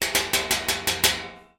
Recording of steel chair being hit by a metal